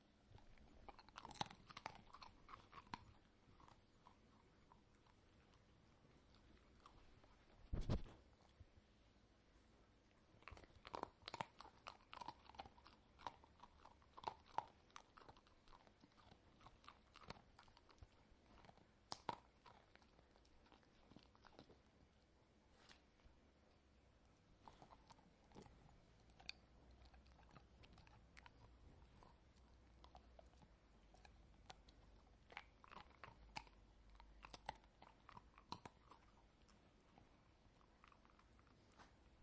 Sound of dog chewing treat
biting, chew, eating